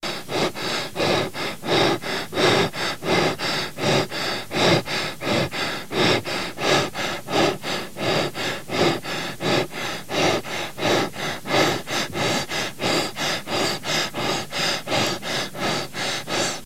Breaths male faster
Breath recorded for multimedia project
breath, gasp